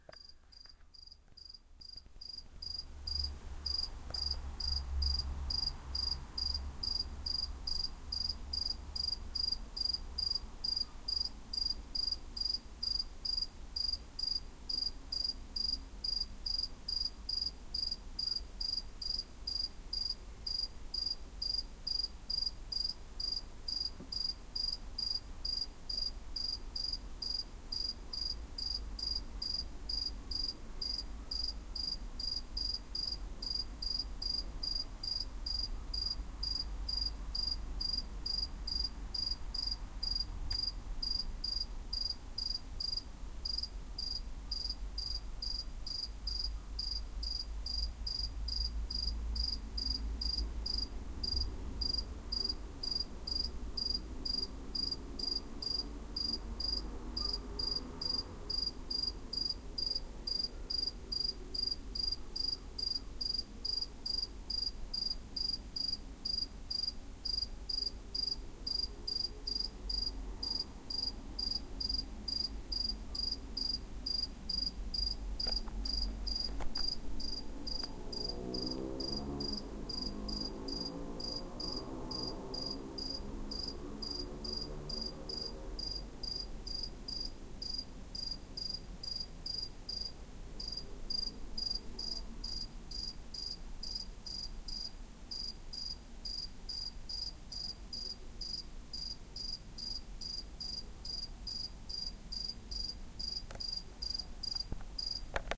CRICKET ON CATS CREEP
Lone cricket looking for love
insect, summer, cricket, crickets, nature